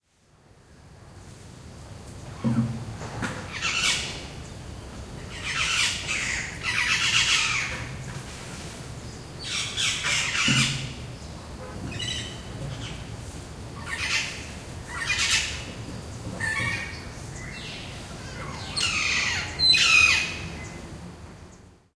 I´ve recorded this birds in Palenque, México with a M-Audio microtrack digital recorder.